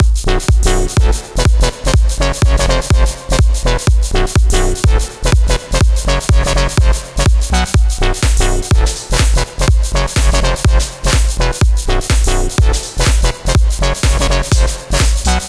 House Music Loop
Has 2 full bars.